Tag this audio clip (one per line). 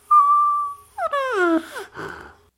air
blowing